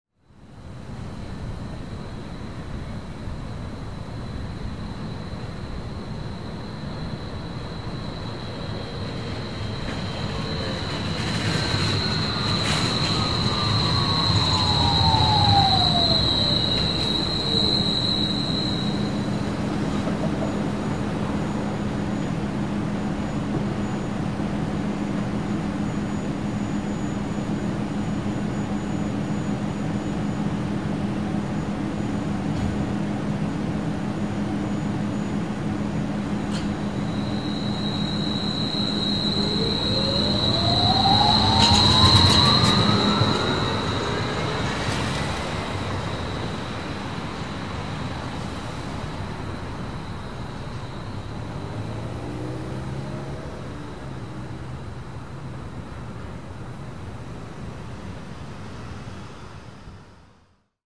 Street Car
mass, pdx, oregon, slowing, soundscape, city, sounds, car, speeding, sound, transit, street, up, portland, down